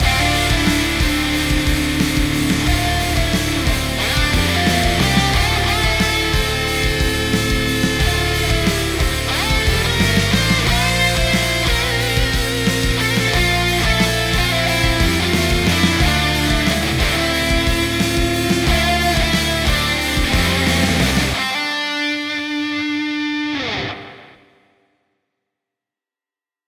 Sad Metal Solo
I recorded a guitar solo & backing track to test out my new guitar tones.